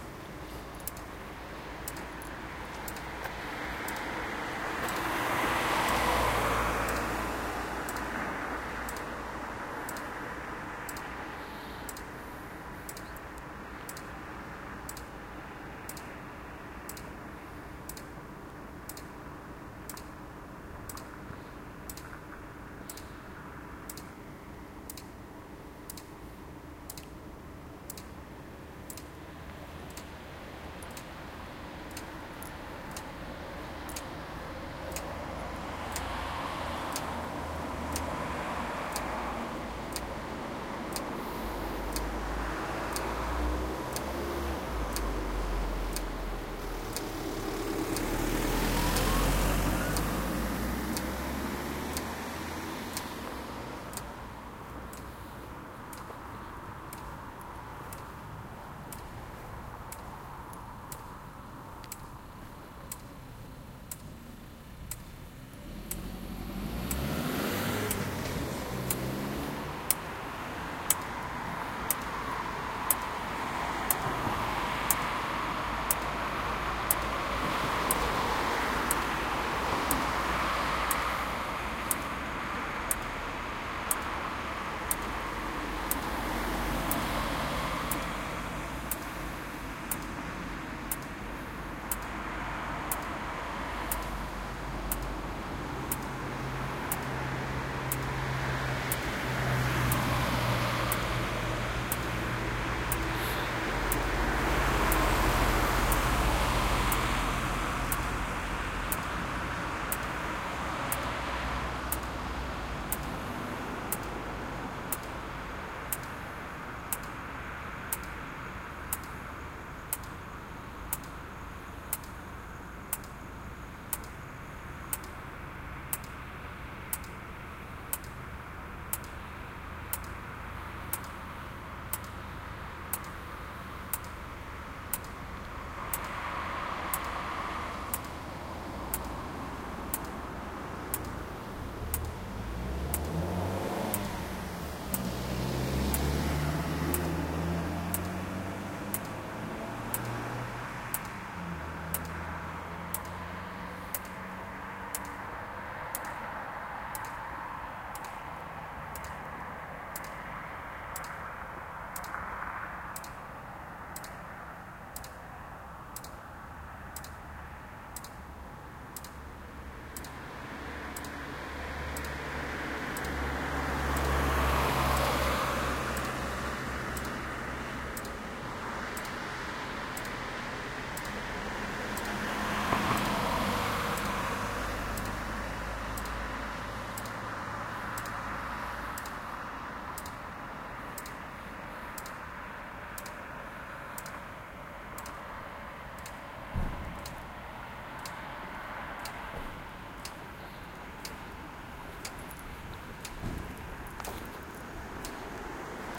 traffic light night
Field-recording of the sound of a pedestrian traffic-light at Kassel/Germany by night. Some traffic, single cars etc., stereo effects between the two sides of the street, i. e. the two parts of the traffic-light. Recorded with an Edirol digital recorder.
field-recording, pedestrian, traffic, ambience, traffic-light